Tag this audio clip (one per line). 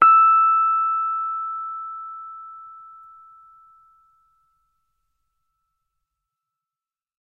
tube
rhodes
tine
fender
keyboard